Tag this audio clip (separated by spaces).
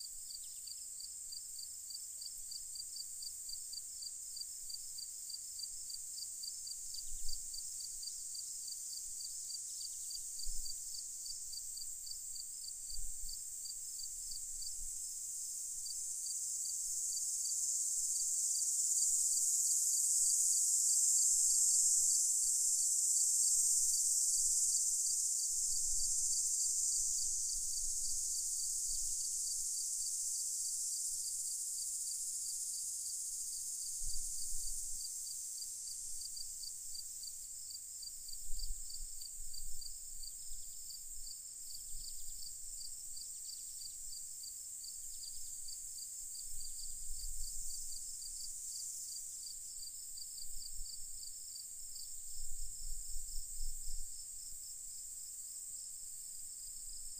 birds bugs chirp chirping chirps clean cricket crickets field field-recording fields hiss hissing insects loop natural nature outdoors